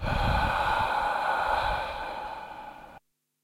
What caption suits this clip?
Breath recorded for multimedia project
breath, gasp